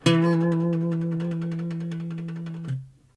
student guitar vibrato E
Vibrato notes struck with a steel pick on an acoustic small scale guitar, recorded direct to laptop with USB microphone.
vibrato, guitar, acoustic, scale, small